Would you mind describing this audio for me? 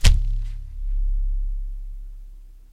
Balloon Bass 04
Balloon Bass - Zoom H2